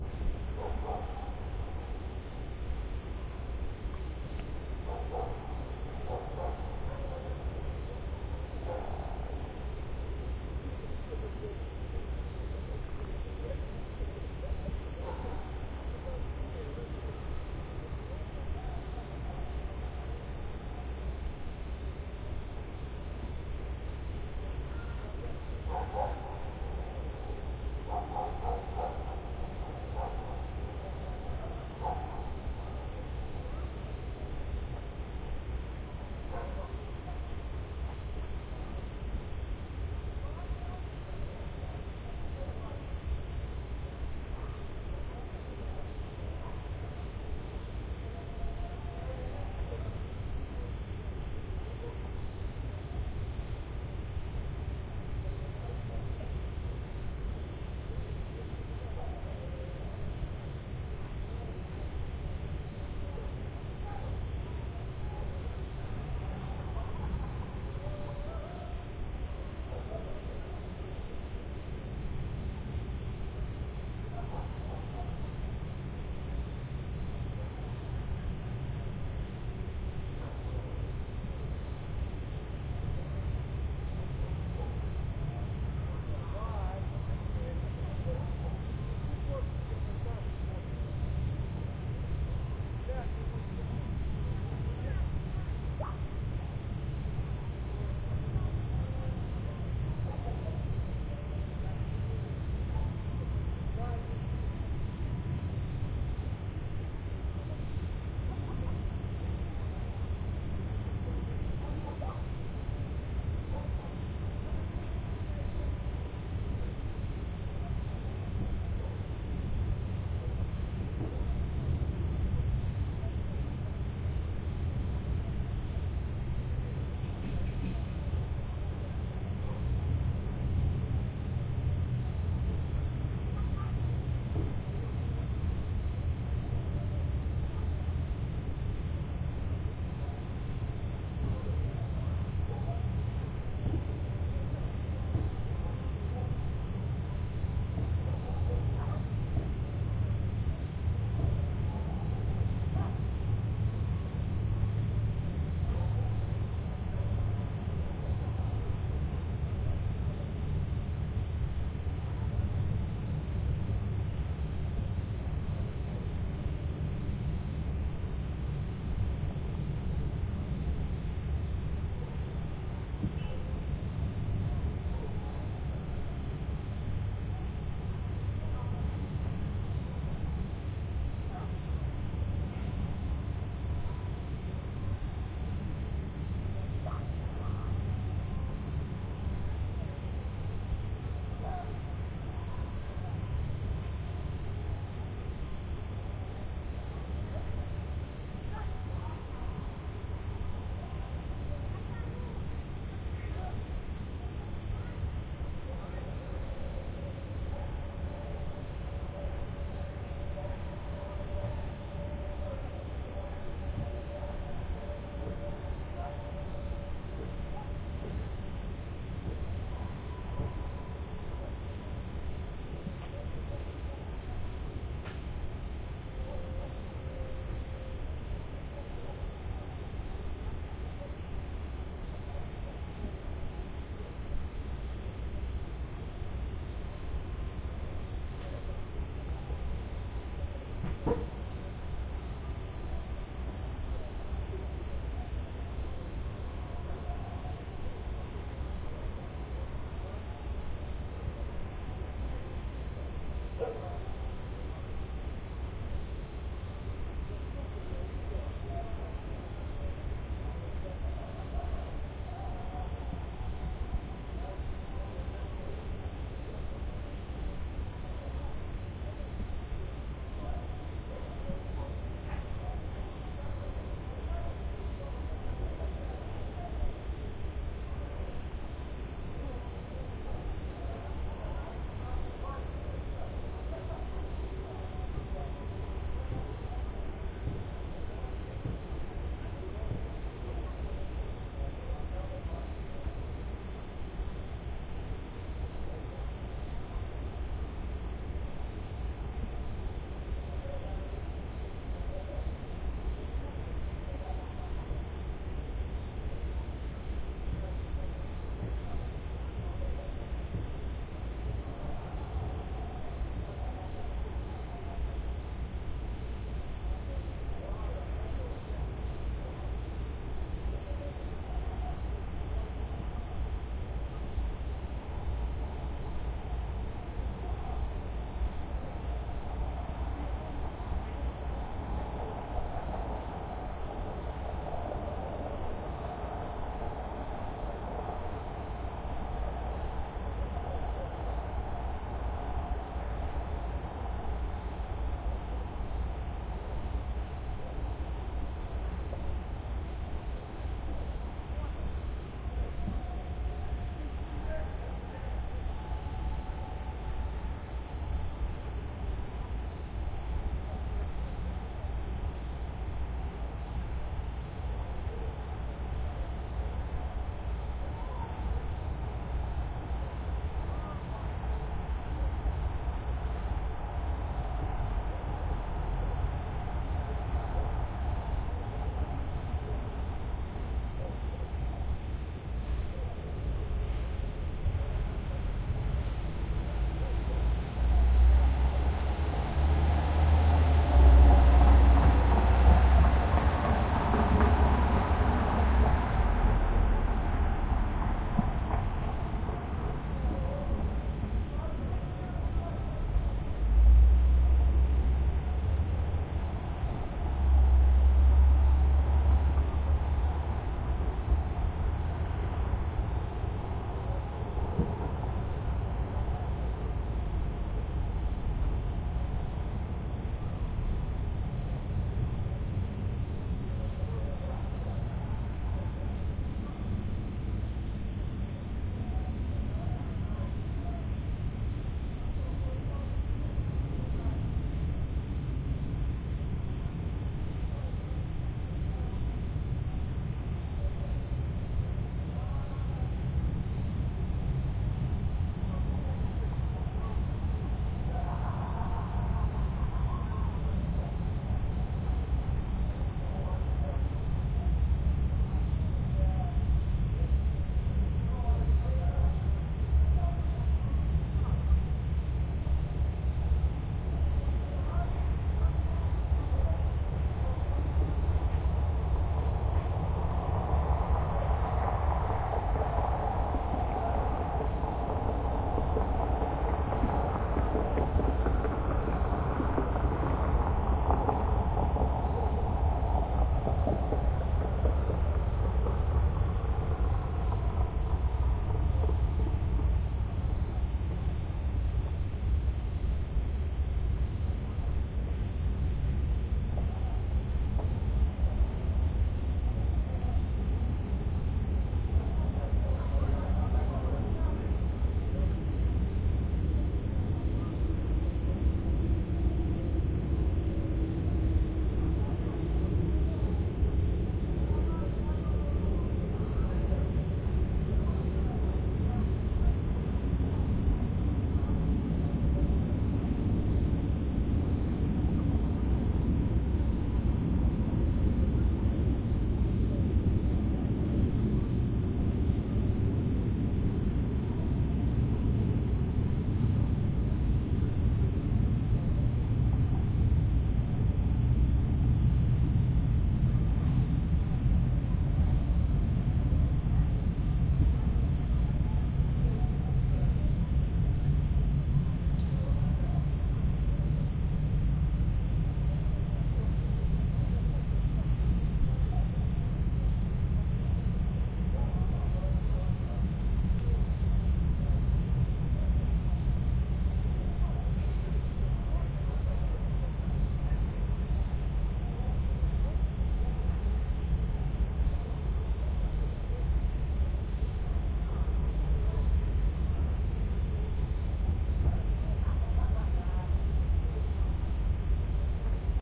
Night sounds recorded in the countryside near Moscow. Dog barking, human voices, a bit of drunk laugh and scream. a car passes nearby and train at the background. complete with the sounds of a plane flying in the sky and the night activity of insects. 18th august 2013, 23:00 pm
recorded with Tascam dr-40 (built-in mics).